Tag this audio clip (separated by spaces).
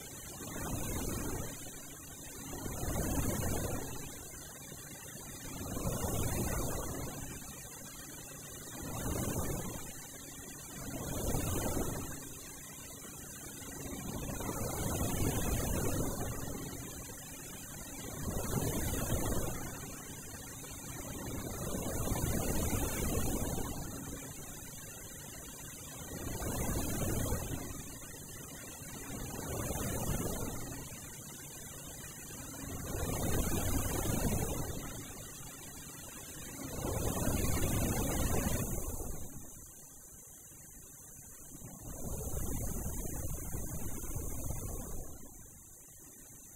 field-recording school sfx